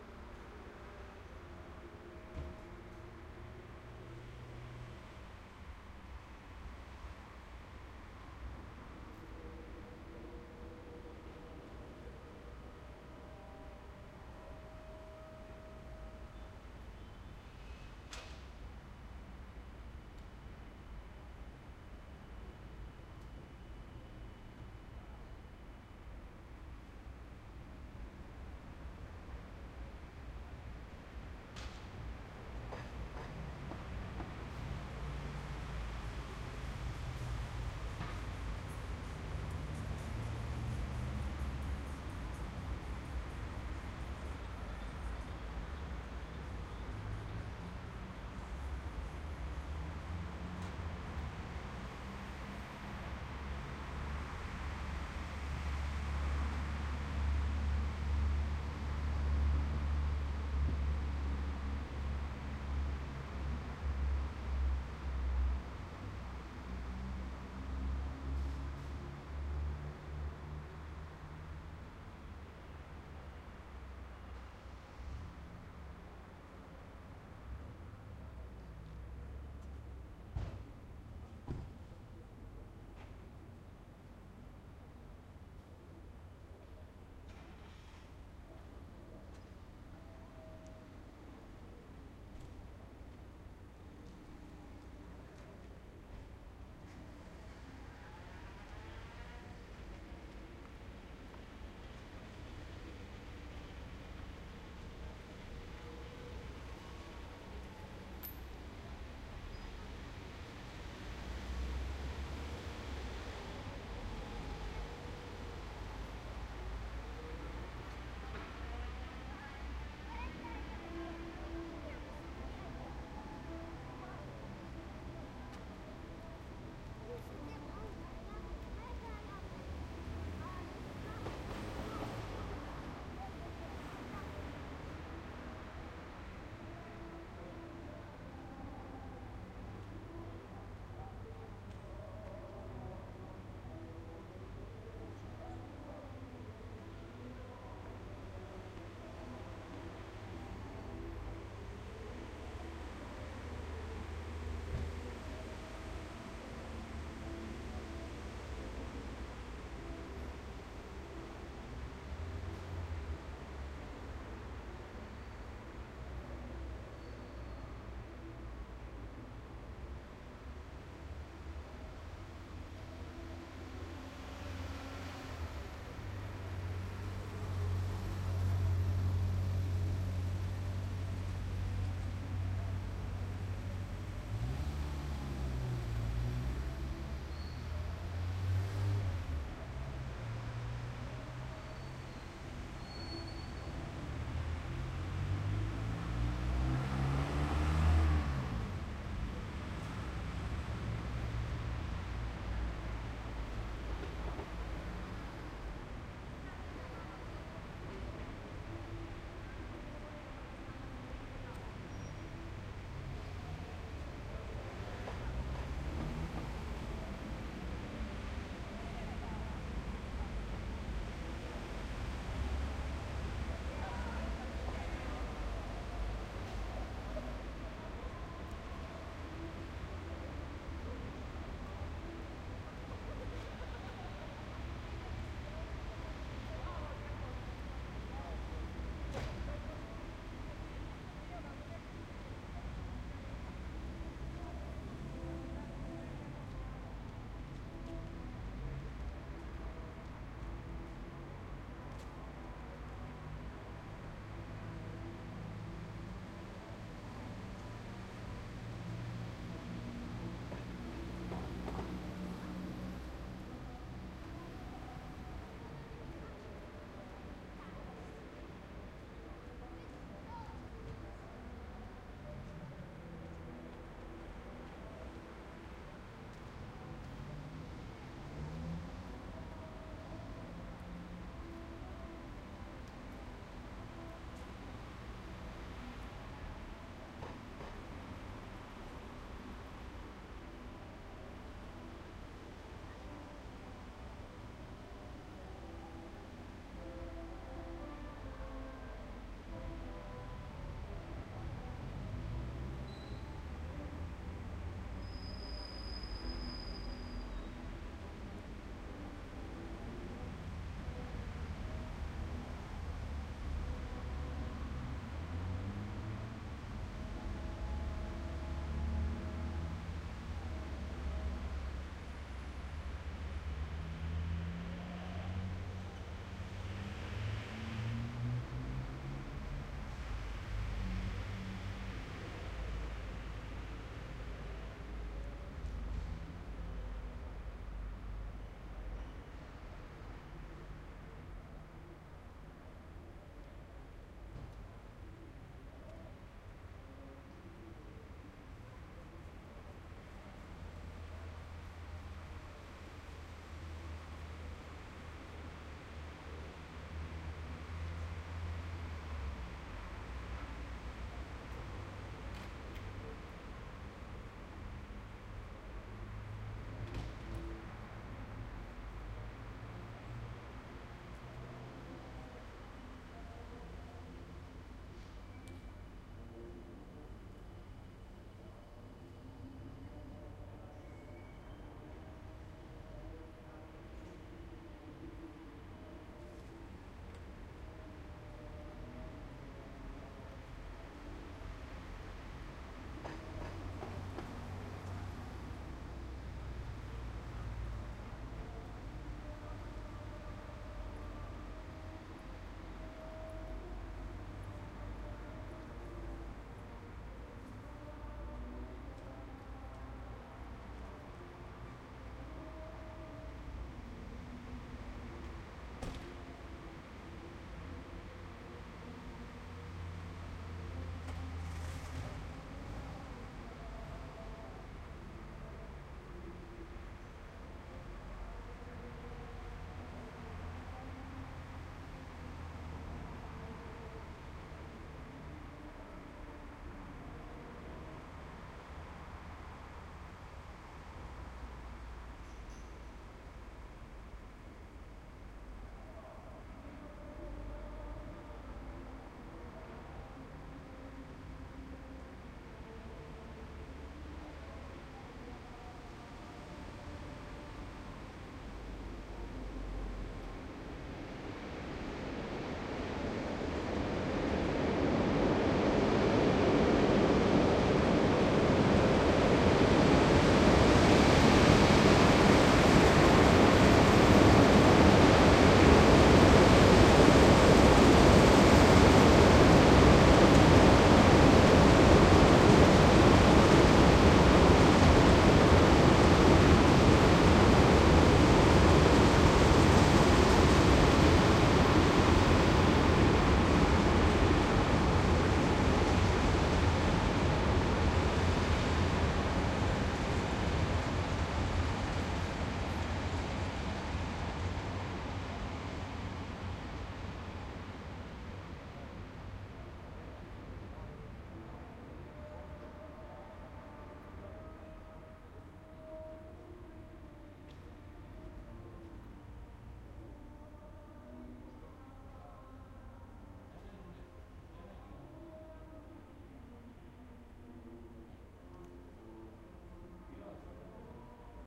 citySoundscape Midnight Rijeka Skoljic --
large parking surrounded by street in front train in distance (1km) summer festival
city, train, skoljic, rijeka, soundscape